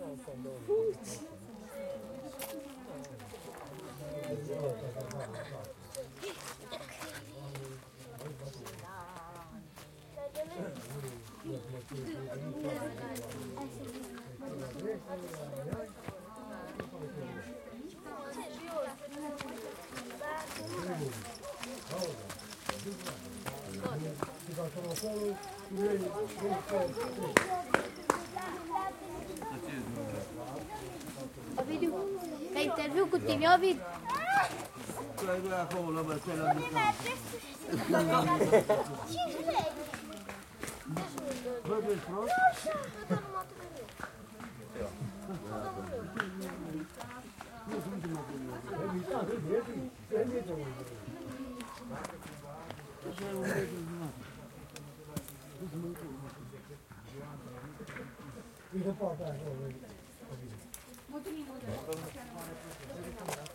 201007 Tichindeal VillageStore Evening st
An early autumn evening in front of the general store in the Transsylvanian village of Țichindeal/Romania. Some 20 villagers of all ages are sitting in front of the store, the grown ups chatting and drinking beer, the kids running around playing.
Recorded with a Rode NT-SF1 and matrixed to stereo.